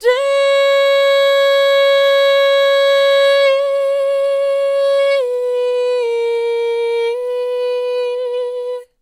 KT-Day Dry
Katy Singing. This is a dry (rather expressive) singing of the word 'day'. It is pre effects.